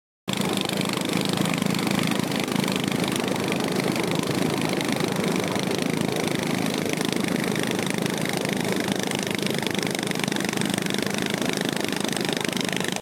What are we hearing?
River Motor Boat Jungle Cruise
A long prop motor boat travelling up a river. I recorded this in 2019 on the Secure River in Bolivia from inside one of those boats with the single long propeller shift.
boat, cruise, engine, field-recording, jungle, motorboat, river